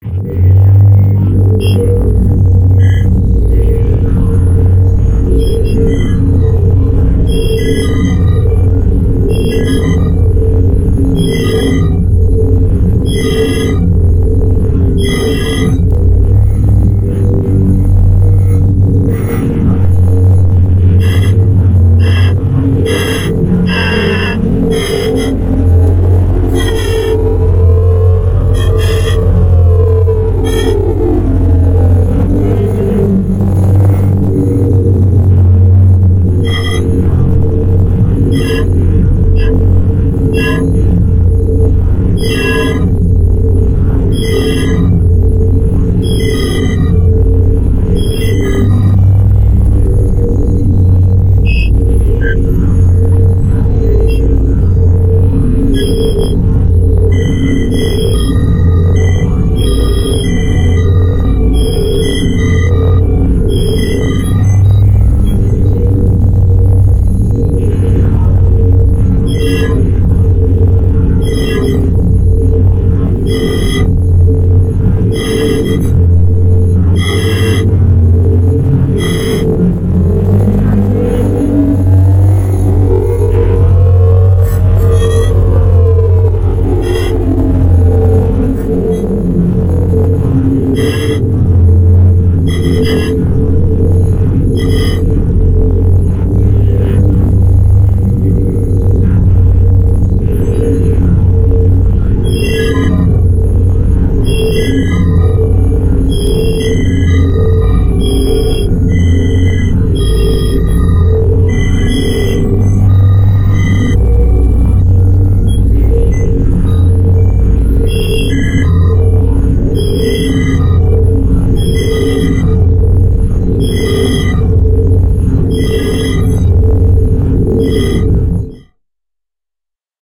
Picture yourself being abducted by a highly advanced alien race, you're trapped inside one of their crafts, being far far away from earth at this point, I guess this is a soundscape that reminds me of it, created by experimenting with various free plugins including dtblkfx and thesis2b, both amazing tools on their own, more than capable of giving you glitchy squelchy hi-tech sounds.